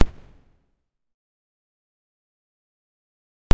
Impact Knock With Echo/Reverb
Impact noise with reverb.